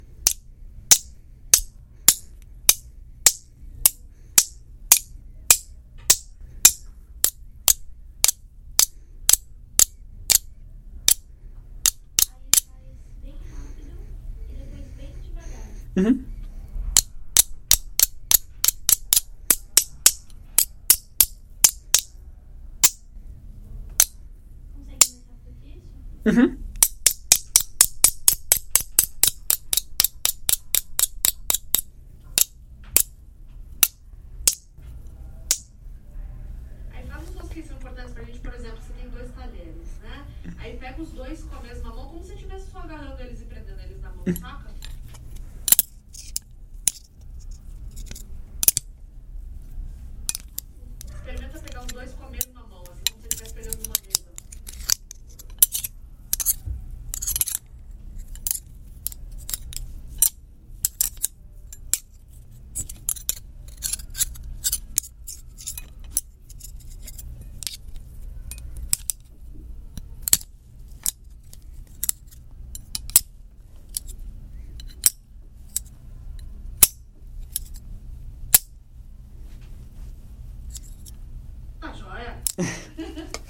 Spoons beating
Anhembi, Beating, Cutlery, Spoons